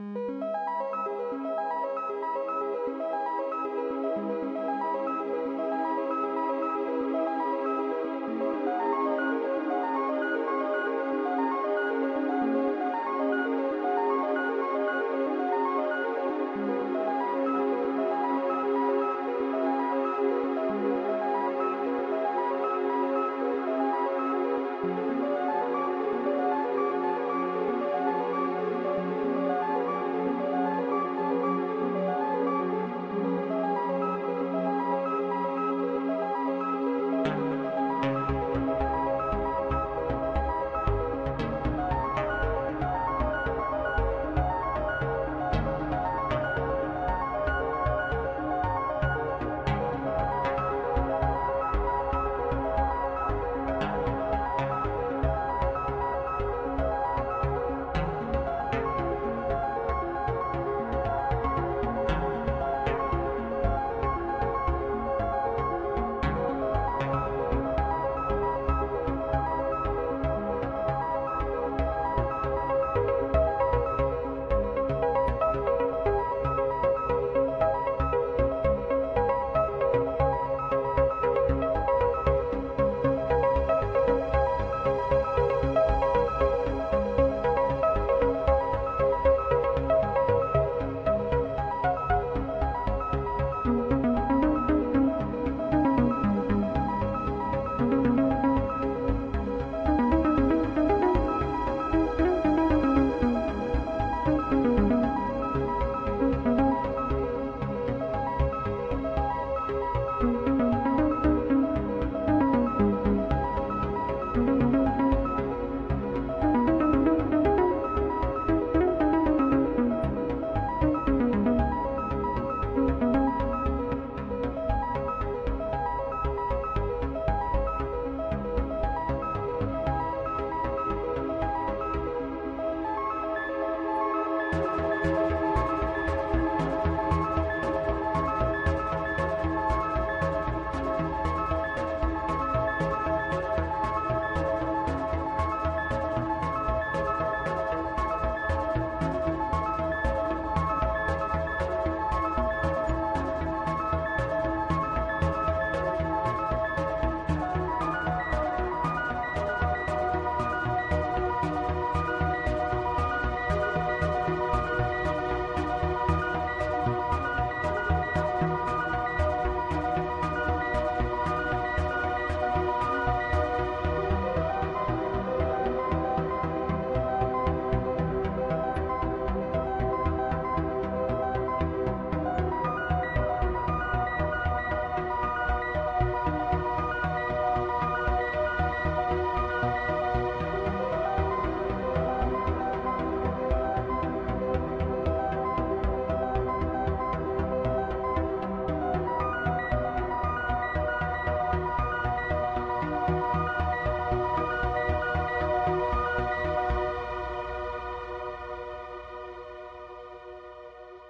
Slow ethereal sequencer music fragment
Ethereal e-music. Intro, Link or Edit.
VSTs in DAW.
atmosphere, electronic, music, slow